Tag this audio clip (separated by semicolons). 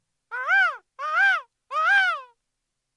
seagull; birdman; birds; animals; 3naudio17